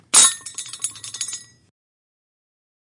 Chain Snare Wiggle
One sound taken from the 'Microblocks, vol.1' percussive found sound sample-pack. The sample-pack features 135 unique field recordings culled from the ordinary soundscapes of Santa Cruz, CA.